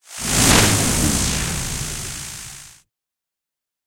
Electro Hit 02
I made this sound with Sound Forge Audition Studio and Reaper. This is one of four sounds which you can use as Lightning Spell or anything with electricity in your game.